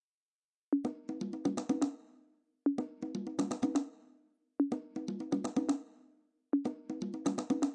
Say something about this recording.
Percussions Electronics with Ableton Live